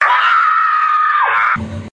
Screaming And Stabbing-
Screaming And Stabbing
Scream, Loud-Scream, Stabbing